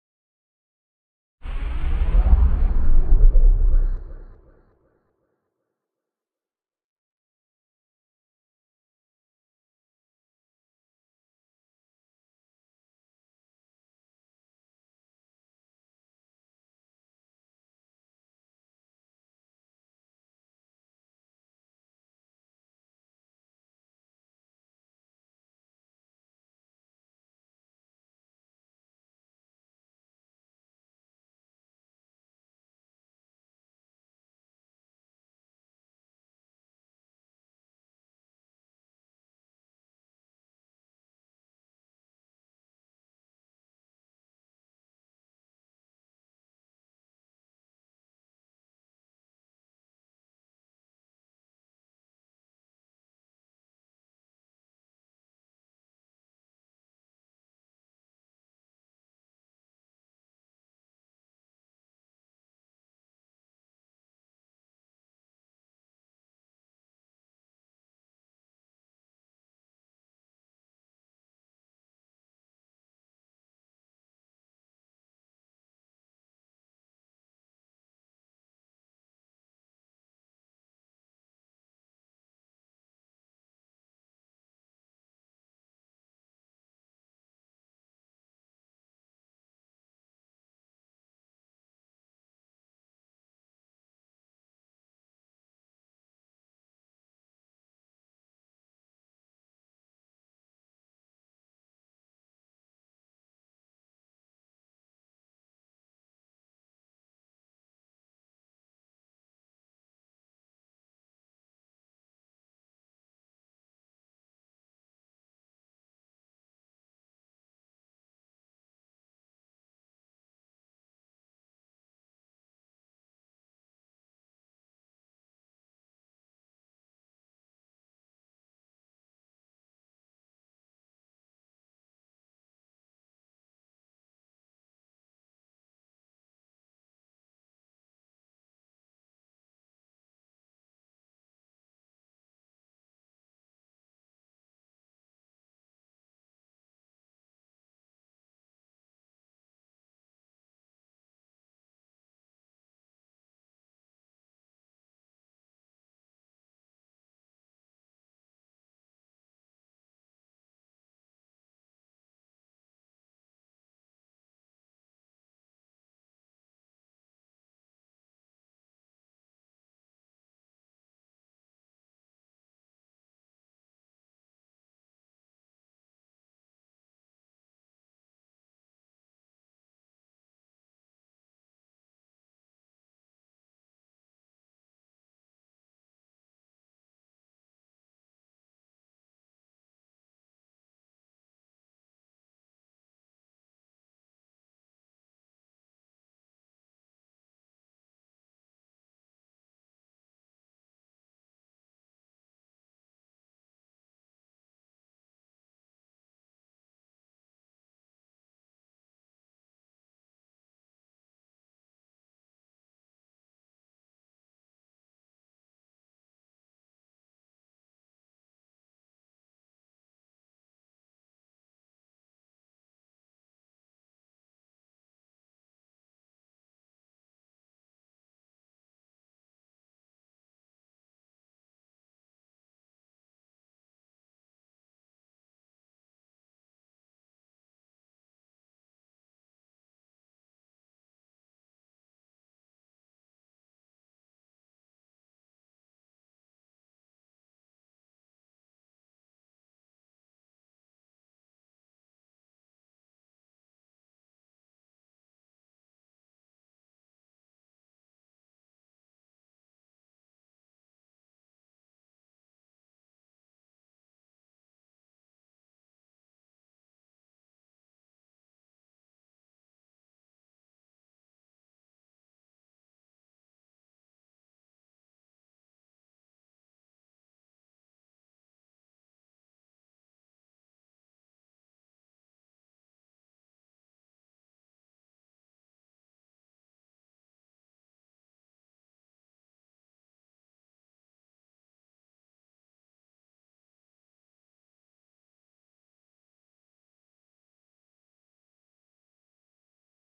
A subtle, almost distant blast off sound. Good for layering or as the end sound to a longer sequence.